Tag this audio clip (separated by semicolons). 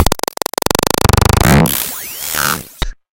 digital; glitch; random